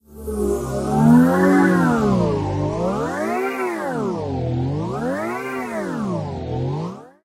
Alien Siren
This is a light wave pad on FL Studio 9 (demo) that I warped and tinkered with until I got it to sound like this.